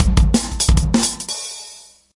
eardigi drums 20
This drum loop is part of a mini pack of acoustic dnb drums